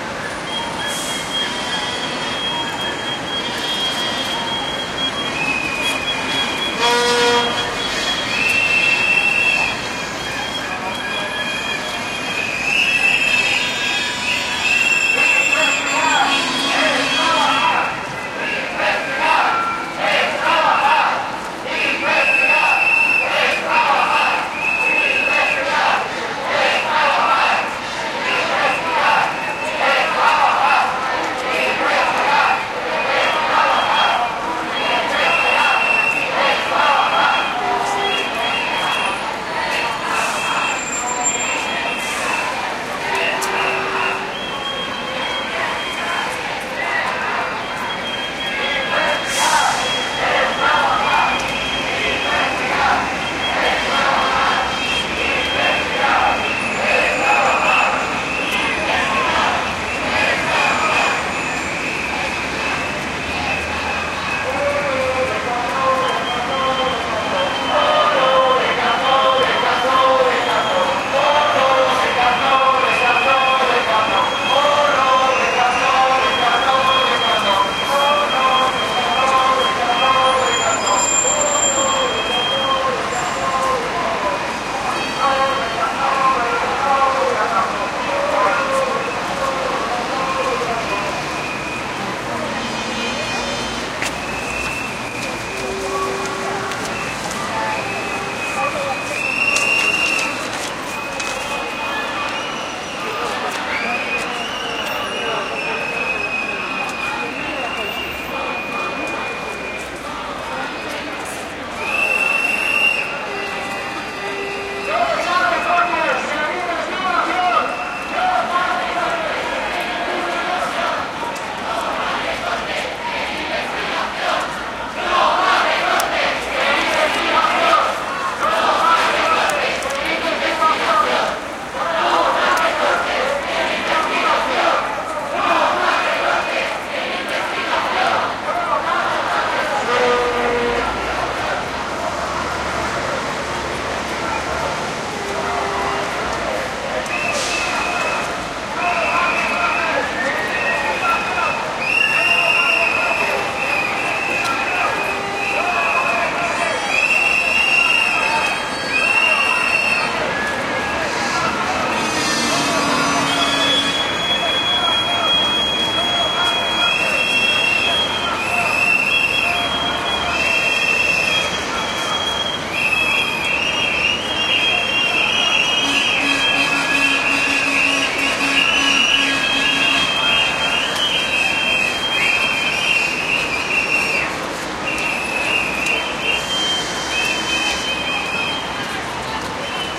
people shouting slogans (in Spanish), whistling and making all types of noises. Recorded during a demonstration at Paseo del Prado (Madrid) against cuts in research budget by the Spanish Government. Main slogan: "Investigar es trabajar" (Research is hard work). Olympus LS10 internal mics.